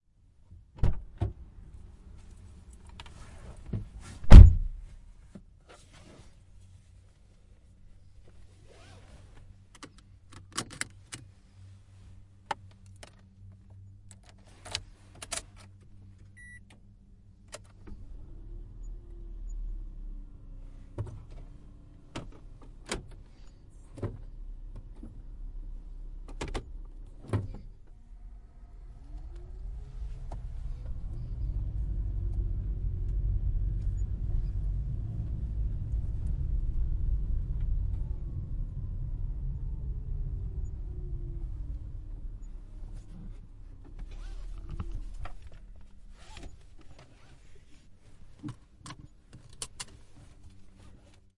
Interior Prius door open seat belt on away stop off
Toyota Prius C, interior perspective, driver enters car, closes door, puts on seatbelt and drives then stops, turns car off.
Prius, Hybrid